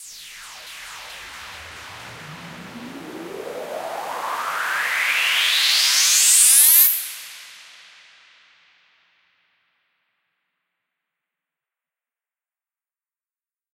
Simple uplifting sound, made on a rainy sunday. Try to use it in my trance music production.